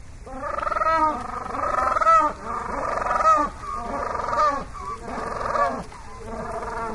Two Magellanic Penguins braying, with water in the background. Recorded with an Edirol R-09HR.
bird, aviary, zoo, penguin, birds, exotic, field-recording, tropical